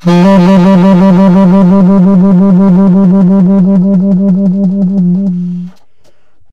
TS tone trill f2
The format is ready to use in sampletank but obviously can be imported to other samplers. The collection includes multiple articulations for a realistic performance.
jazz, sampled-instruments, sax, saxophone, tenor-sax, vst, woodwind